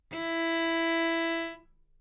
Part of the Good-sounds dataset of monophonic instrumental sounds.
instrument::cello
note::E
octave::4
midi note::52
good-sounds-id::4326
cello, E4, good-sounds, multisample, neumann-U87, single-note